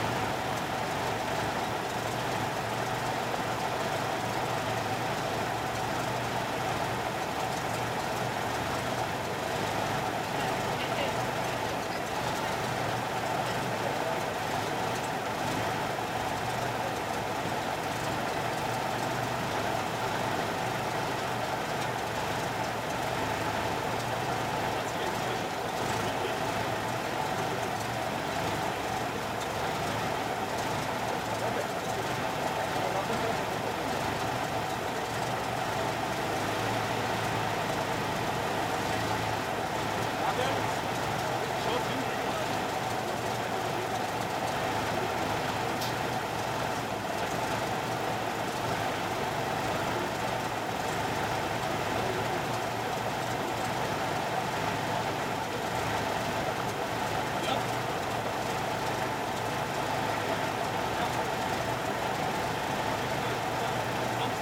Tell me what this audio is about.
Recorder: Fostex FR-2
Mic: Audio Technica AT-835b (LoCut on)
Mic Position: about 20cm (tip) away from front of motor block
Post-processing: None
The Rolls Royce limousine is a model from about 1930-1940; Since I haven't asked, I don't know the actual model number.
This is a by-chance recording during a short break at a film set - Which is why there are people in the back talking.
Also, I hadn't the time to remove the LoCut.
Recording of the idle motor noise.